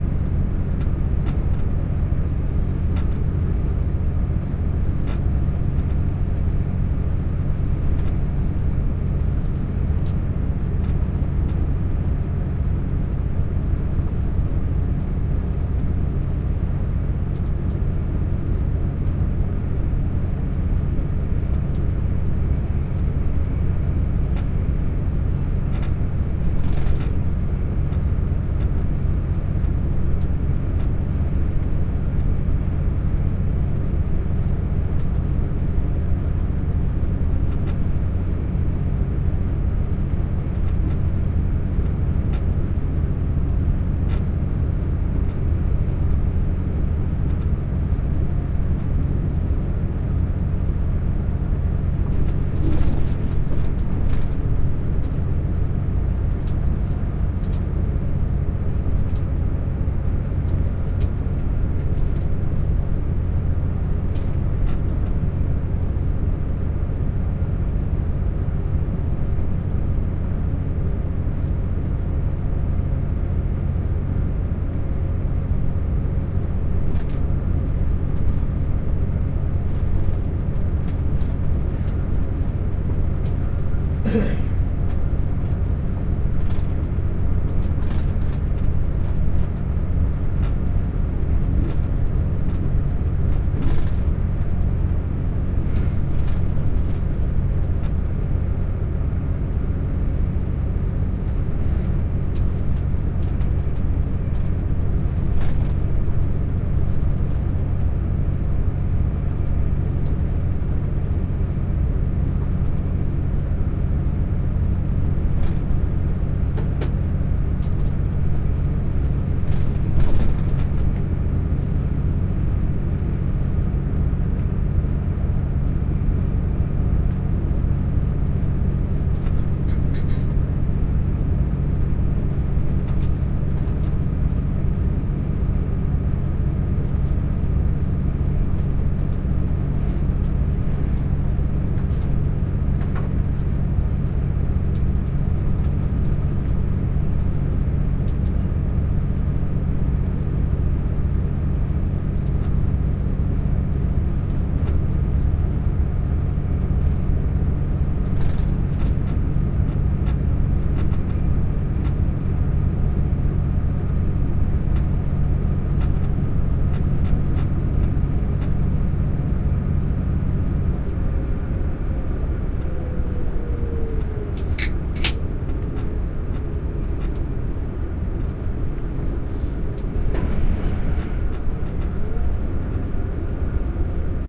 Inside carriage E of Grand Central Train Service from Kings Cross to Mirfield. Recorded using a Zoom H5. Not processed uploaded as is.
Carriage, Central, Grand, Service, Train